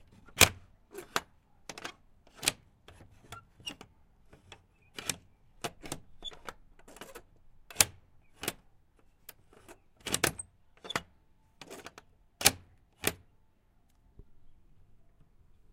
This was recorded with an H6 Zoom recorder in my home. I used the bolt lock on my wendy house to give the sound of something locking or unlocking without the use of keys.